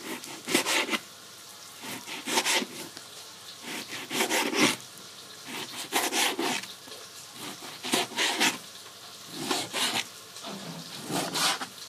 Cutting meat on a synthetic cutting board. Recorded with an iPhone 6.